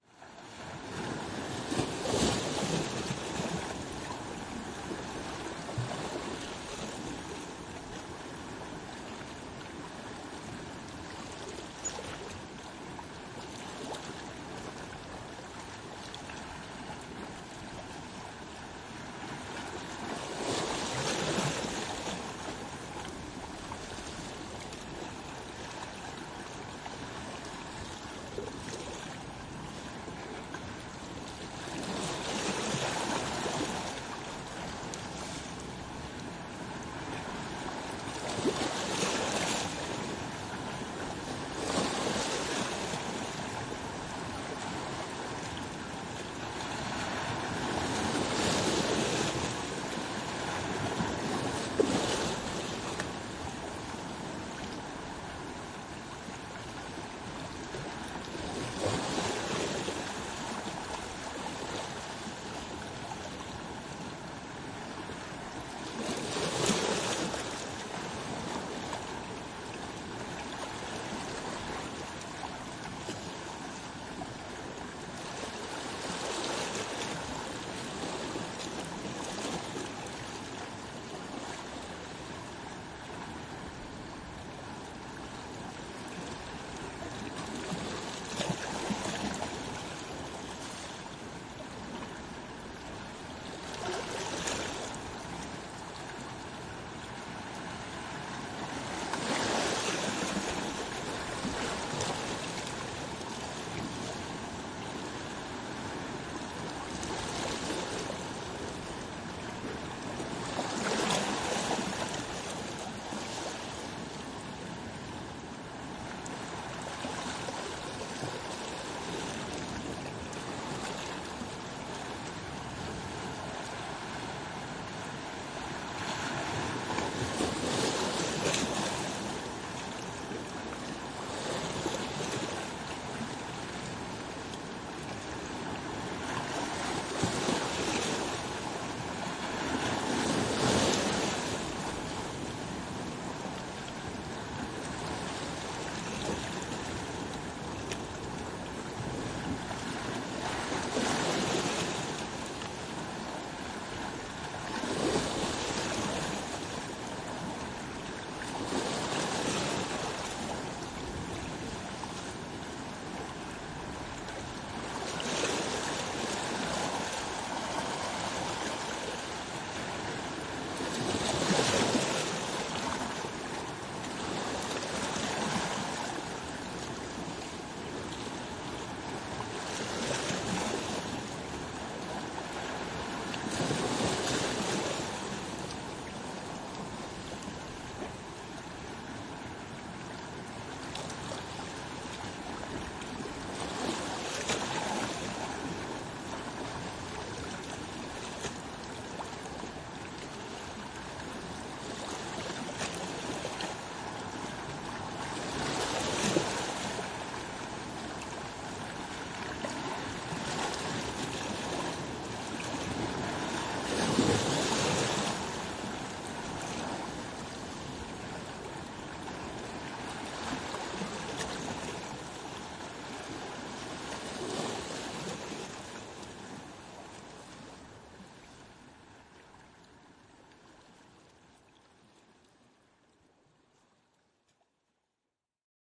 Movements of the sea in little rocks. Windy automnal weather, no bird, no human activity, only water and rocks. Samll waves (half meter)
Mics are one meter above the sea.
Brittany, France 2020
recorded with 2 Clippy EM172 in an AB setup (40cm)
recorded on Sounddevice mixpre6